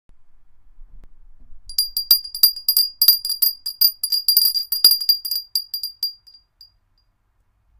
Bell, ringing, ring